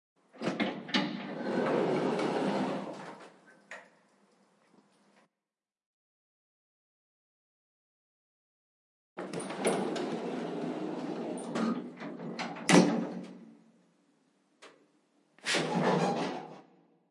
Elevator Door opens and closes
Elevator Door opening and closing